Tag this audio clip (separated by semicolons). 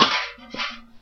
single-hit; play